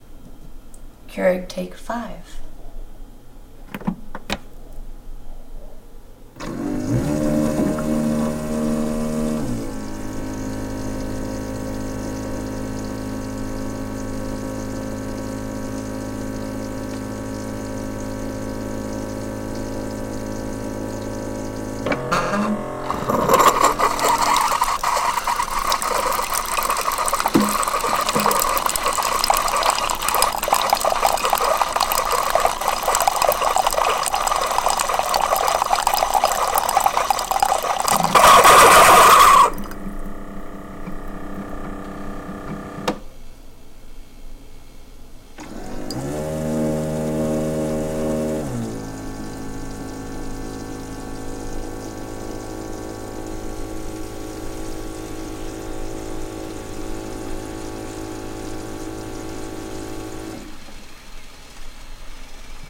The process of a Keurig
coffee, keurig, Pour, off